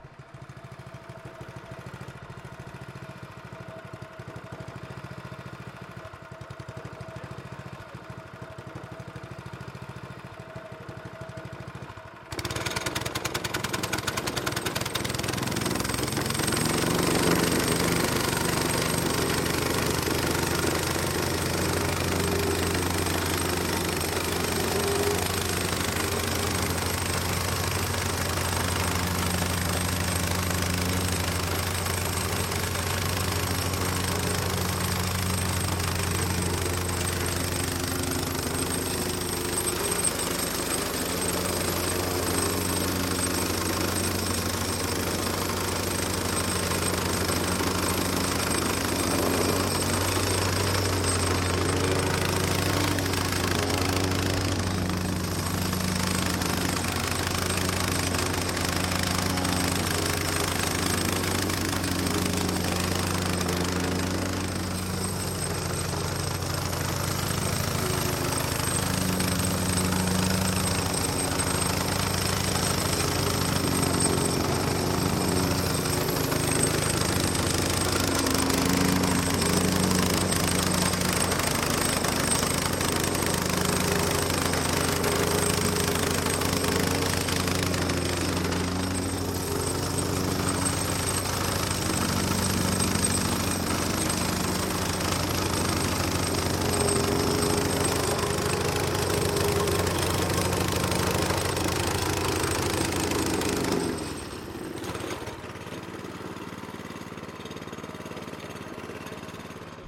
Operating a Plate Compactor
The operation of a Plate Compactor to compress some stone.
Compactor, Idling, Machine, Plate, Stone